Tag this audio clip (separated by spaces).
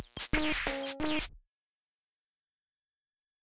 glitch loop 180bpm bleeps weird cuts